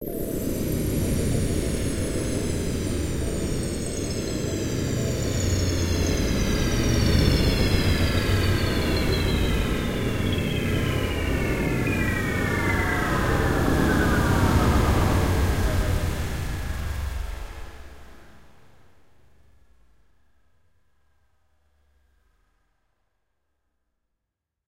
The third render of a SunVox module chain with feedback loops through granular pitch reduction.